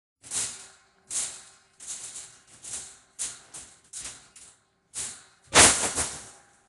I did this by rattling a pet pen - one of those fold-out railing things you use to keep pets such as rabbits enclosed. I was doing it for a video of some rioters shaking and pushing over some hoarding on riot police. The final sound is louder. I did this by throwing the railings onto a pile of newspapers. recorded on my ipod touch using a blue mikey microphone. I took the recording down an octave on cubase to make it sound bigger.